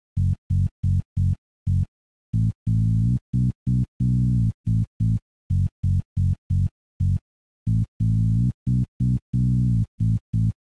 bassline i made for jelly makes me happy